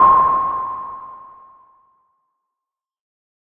Sonar / Echolot sound created with Apple Logic's ES P Synthesizer.
u-boot, ping